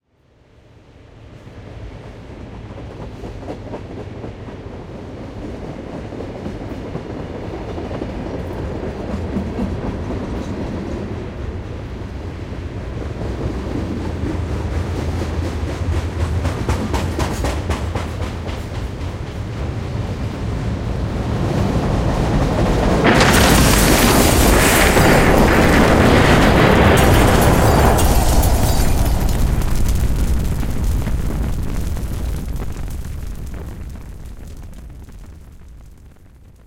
Train destruction
bang, burst, crash, destruction, explode, fall, flame, inferno, smash, train